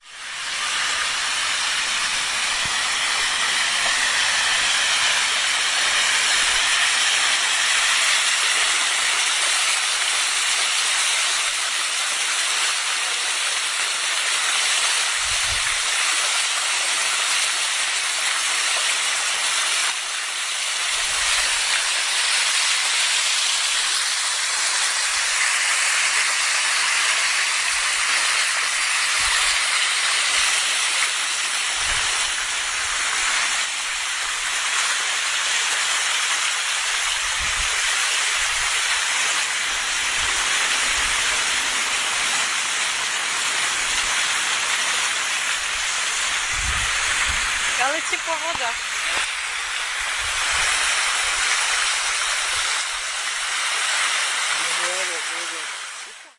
fountain mariacurie120510
12.05.2010: about 21.15. The noisy sound of the fountain located on The Maria Sklodowska-Curie Square (Wilda district, near of the Church of Maria Królowa and Rynek Wildecki in Poznan).
more on: